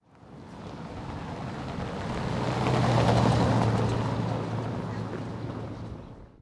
car sound
sound of car recorded in Warsaw
car, engine, field-recording, sound, street